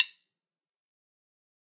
Drumsticks [Dave Weckl Evolution I] open wide №4